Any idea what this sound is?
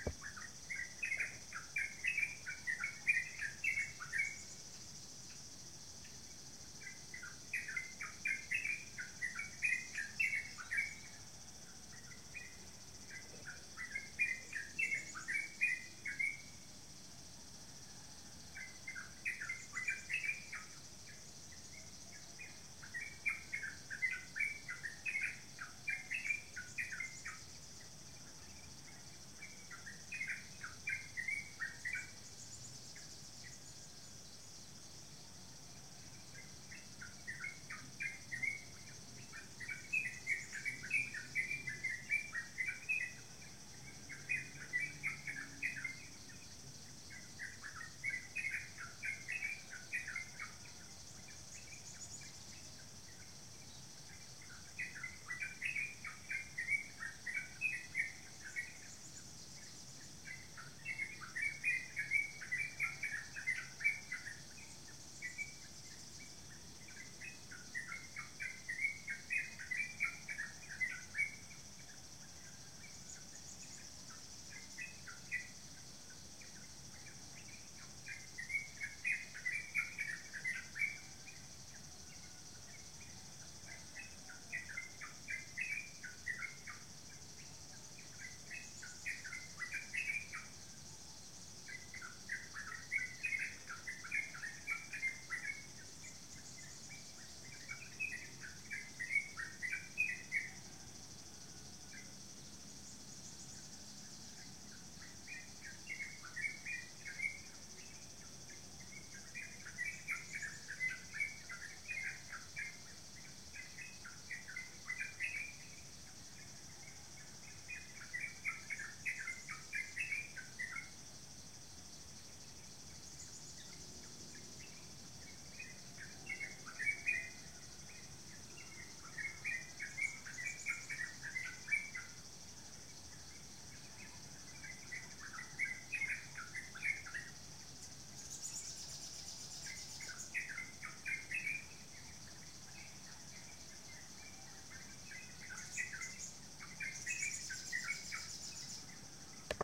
Early Bird Wapa di Ume
Bird recorded early in the morning from hotel balcony at the Wapa di Ume resort in Bali, Indonesia. Internal microphones of the Zoom H4n